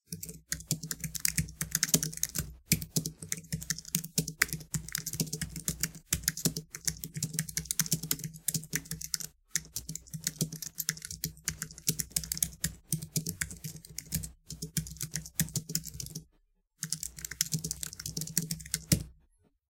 Typing on a iMac keyboard. Recorded with a Zoom H5.